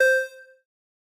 Hum 01 high short 2015-06-21
a user interface sound for a game
videogam, drone, game, hum, user-interface, click, humming